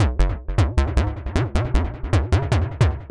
wave from 2 155
another version of a riff i had in my head at the time
155bpm, hard, housemetal, loop, rave, riff, rock, trance